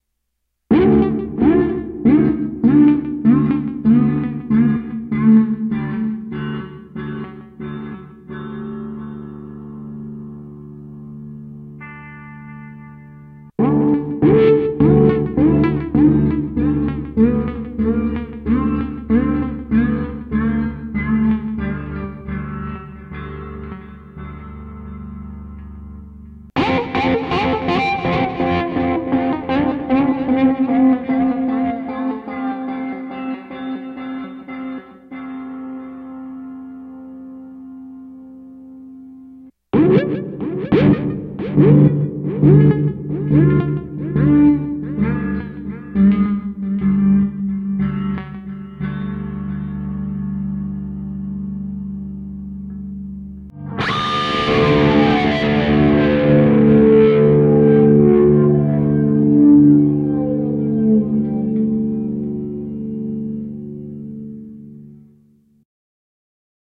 Does it sound like falling? Maybe falling into nothing.
Weird strange ambient with a sort of bounce to it.
ambient, sfx, fx, soundeffect, strange, abstract, noise, freaky, glitch, sci-fi, weird, electronic